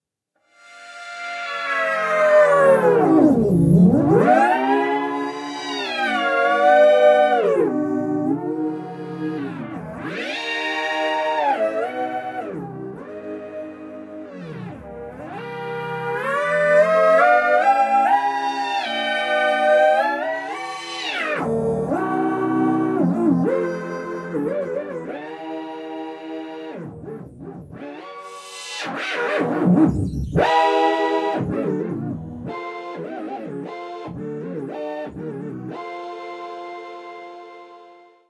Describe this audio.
manipulated pluggo2
loop, sound, synth, test, vst